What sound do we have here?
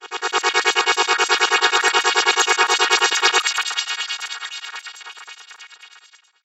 A bionic man-like effect
Created in QTractor with Calf organ and C* Scape stereo delay.
Processed in Audacity to speed up velocity, added a tremolo inverse sawtooth effect, and tremolo sawtooth effect to increase ducking at a frequency, and added fade in and out.
This is probably closer to the original.
slowmotion, slow, bionic, jump, motion, air